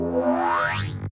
Laser Charge Up
A charge up laser sound. Made from Reasynth and envelope filtering.